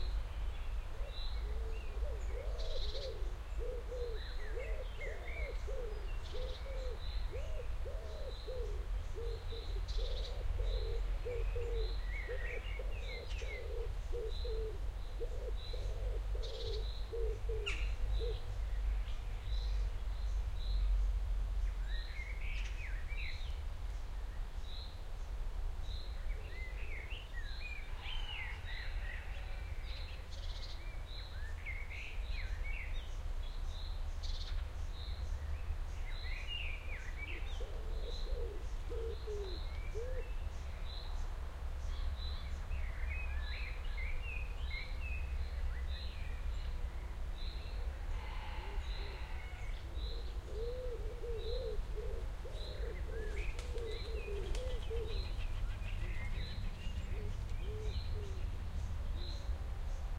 SuburbanSpringAfternoon-MS TestSetupPart4
Part 4 in a 6 part series testing different Mid-Side recording setups. All recordings in this series were done with a Sound Devices 302 field mixer to a Sound Devices 702 recorder. Mixer gain set at +60dB and fader level at +7.5dB across all mic configurations. Mixer - recorder line up was done at full scale. No low cut filtering was set on either device. Recordings matrixed to L-R stereo at the mixer stage. The differences between recordings are subtle and become more obvious through analyzers. Interesting things to look at are frequency spectrum, stereo correlation and peak and RMS levels. Recordings were done sequentially meaning one setup after the other. Samples presented here were cut from the original recordings to get more or less equal soundscapes to make comparing easier. Recordings are presented here unmodified. Part 4: Mid-Side +Mid setup: Pearl MSH-10 single point MS microphone + DPA 4060 omni.
atmosphere, birds, field-recording, mid-side, outdoor, spring